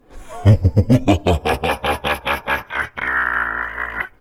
Demon evil laughing
My "evil" laughter slightly processed. Works wonderfully with some reverb. I left it dry on purpose.
laugh, scary